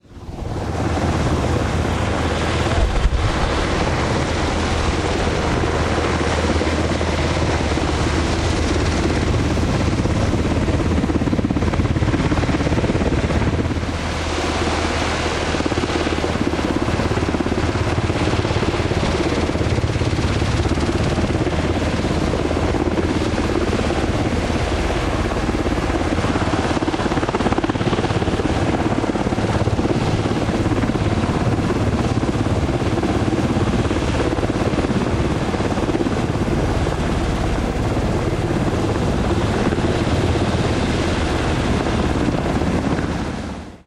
Black Hawk military attack helicopter. Army Special Forces troops in a mock demonstration in downtown Tampa Florida, May 2012.